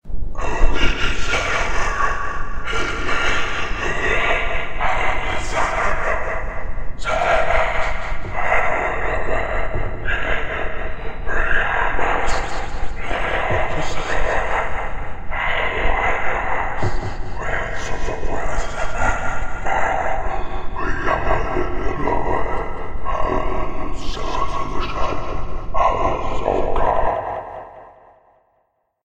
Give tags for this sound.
horror ghosts

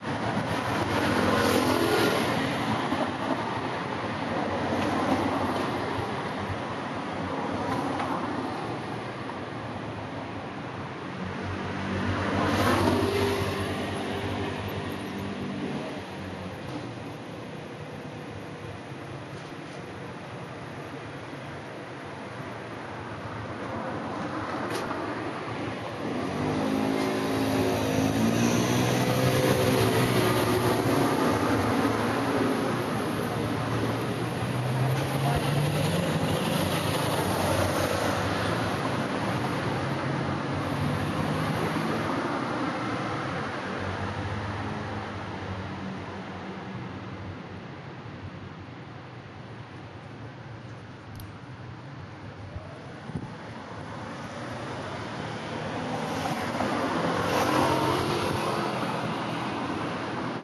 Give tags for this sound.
ambient ambient-noise background background-noise car city firenze florence noise

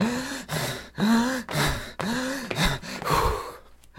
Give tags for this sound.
breathe breathing heavy

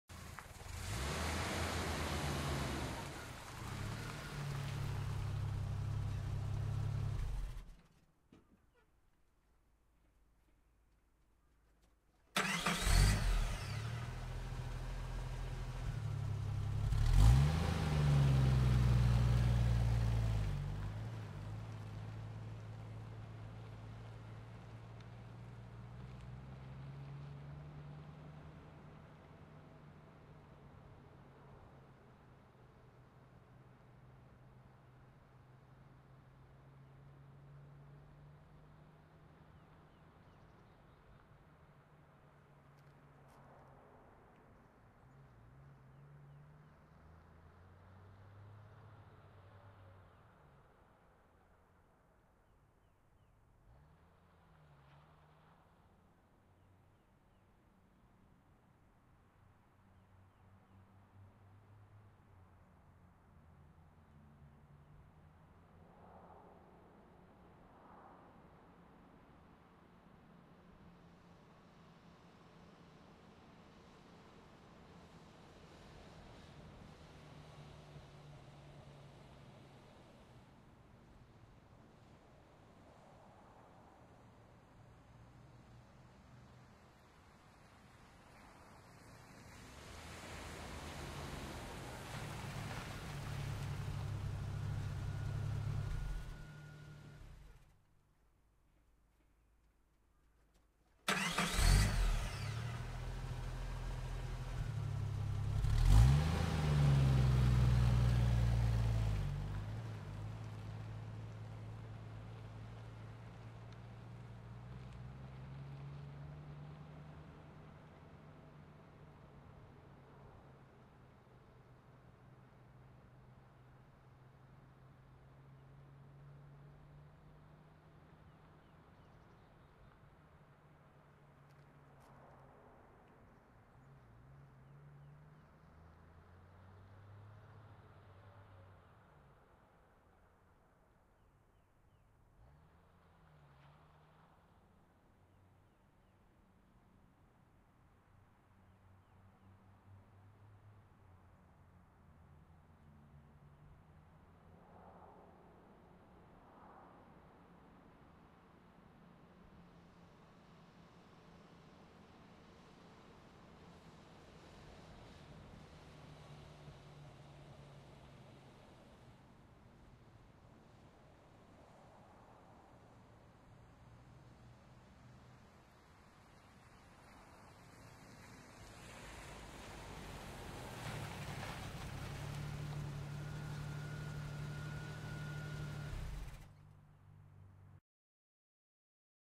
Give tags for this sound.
CAR SOUND FX

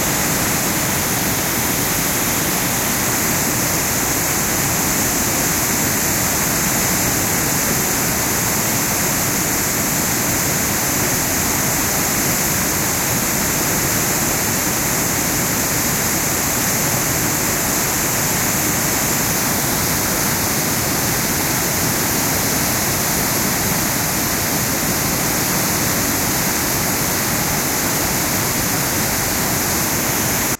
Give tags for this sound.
river ambience nature snow water waterfall field-recording white-noise

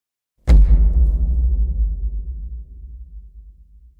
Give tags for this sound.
bomb detonation explosion

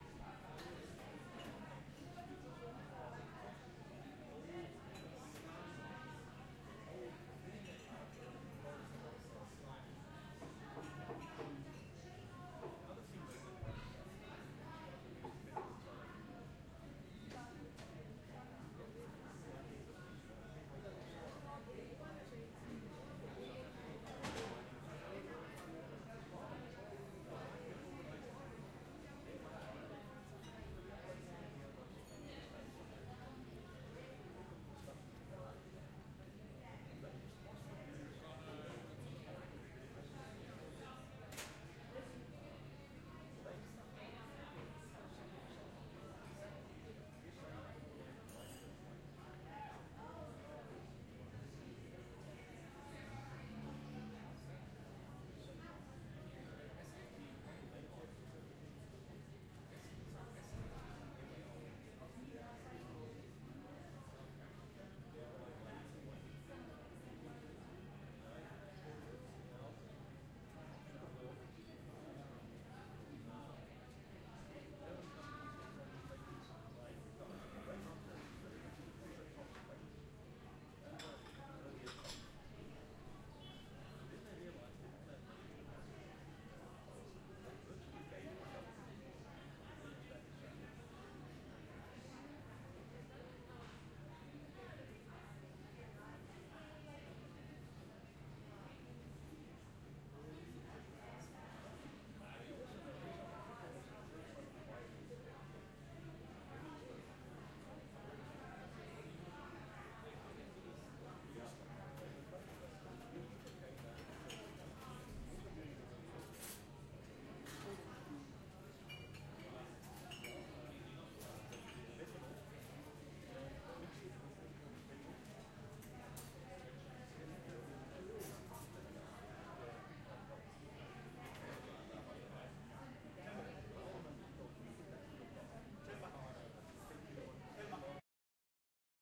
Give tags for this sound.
Ambience Restaurant OWI Shop Coffee

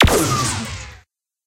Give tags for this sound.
lazer weapons

